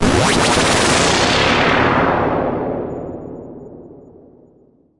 semiq fx 35
future, abstract, sound, fx, sounddesign, effect, strange, electric, sfx, sound-design, sci-fi, soundeffect